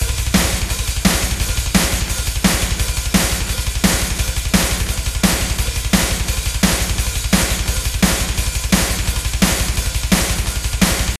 Metal Drums 6 Doubletime
metal drums doubletime
doubletime, drums, metal